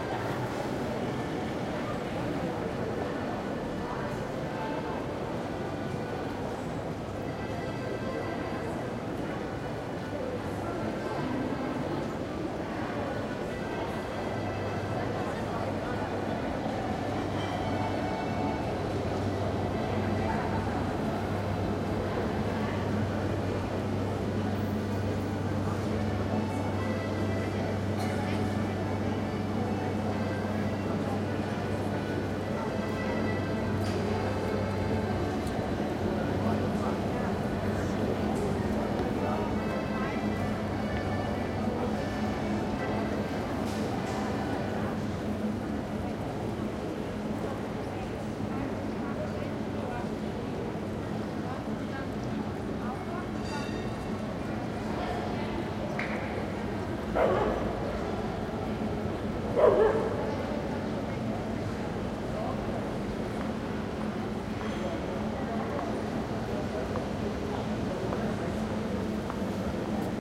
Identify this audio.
4ch surround atmo recording of the Hauptplatz (Main Square) in Graz/Austria. It is a warm summer evening, lots of people are shopping and going about their business. An accordion player can be heard in the midfield, as well as trams from the nearby stop. The recorder is situated in front of the City Hall, facing outward toward the square. People can be heard walking and talking in the arcades of the City Hall in the rear, a dog on the square starts barking toward the end of the recording.
Recorded with a Zoom H2
These are the FRONT channels of a 4ch surround recording, mics set to 90° dispersion.